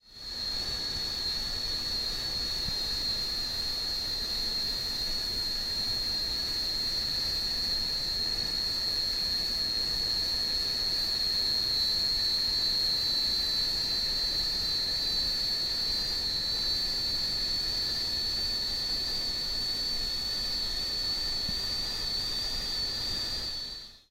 Jet interior ambience JPN
Interior of a jet - wind sound from ventilation in cabin.
interior, jet, ventilation, wind